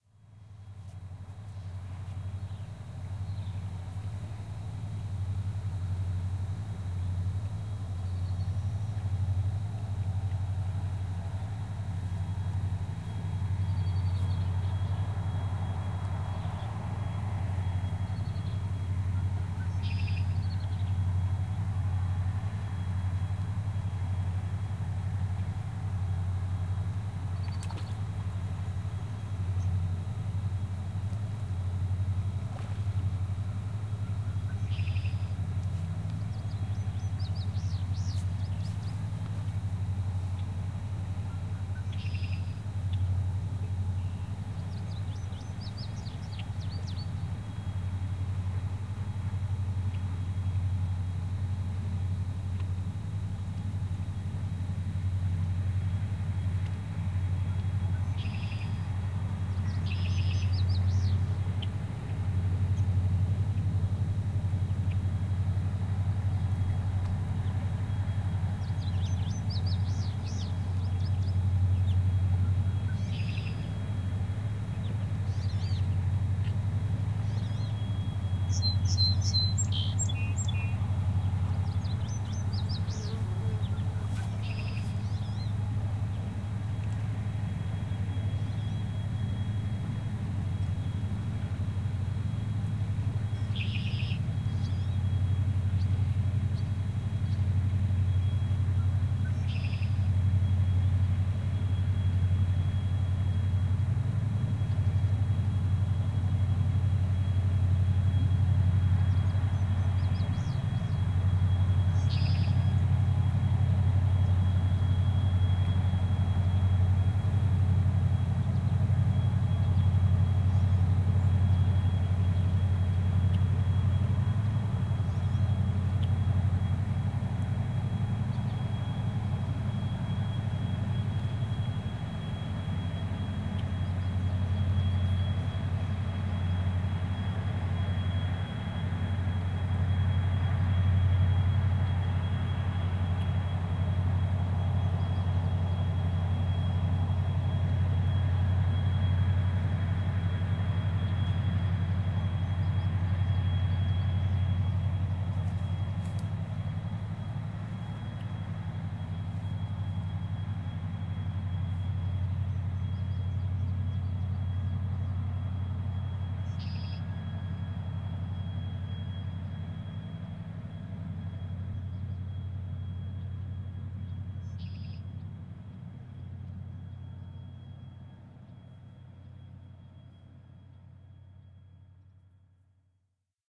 Besides being an inspiration since man first explored her, the ancient Mississippi River is also a highway for many coal-carrying barges. For this recording my Zoom H4N was on the soft, powdery sandy bank about 60 feet from the river…you hear the gentle, but powerful thrumming of the giant diesel engines as a huge, 200 foot barge passes by. Some notable natural sounds are a very clear Red-Wing blackbird at 20 seconds in, and again at one mine in and again at one-minute 13 seconds into it. You hear a wonderful, clear splash at 27 seconds into as well. Recording made around 4PM on a warm -- 80 degrees -- June day. Close your eyes, dig into the powdery, soft sand of the Mississippi River bank and float away on a warm summer day.
water, red-wing, Mississippi, nature-ambience, field-recording, transportation, summer, sound-scape, birds, splash, river, blackbird, barge